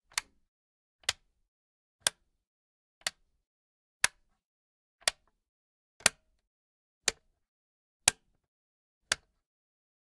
Variations of a wall socket switch being turned on and off.